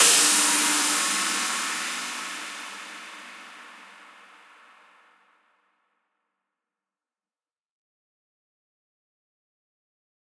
DR Cymbal 07